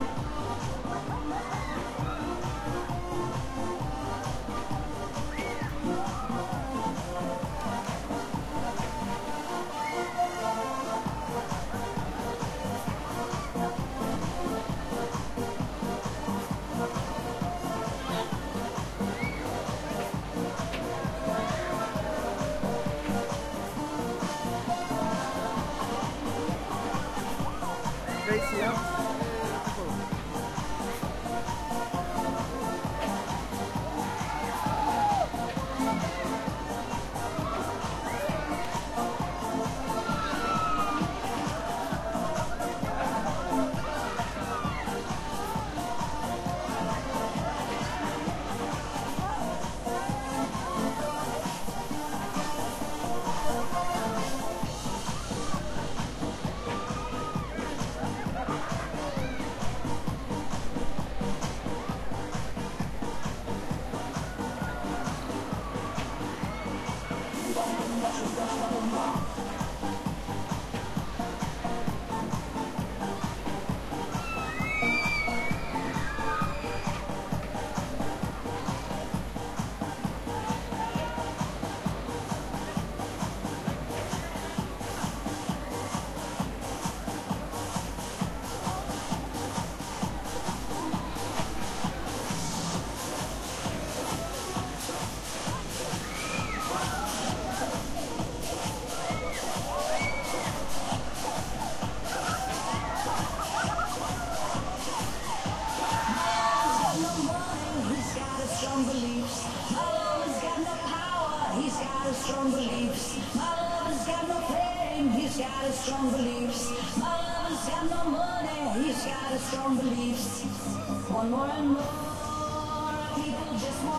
Fantasilandia Ambiente
Recorded in Fantasilandia (amusement park) in Santiago de Chile.
fantasilandia, park, amusement